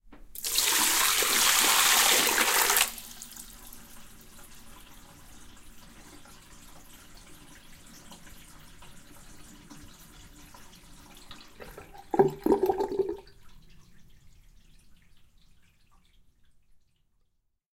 Water Pour, Sink, A
Audio of pouring a large bowl of water down a sink with the sound of the water running through the plumbing left in. The gurgle of the drain is also present. Some slight EQ in bass frequencies which periodically spiked.
An example of how you might credit is by putting this in the description/credits:
The sound was recorded using a "Zoom H6 (XY) recorder" on 18th January 2018.
Ppour, pour, water, sink, fill, liquid, pouring, drain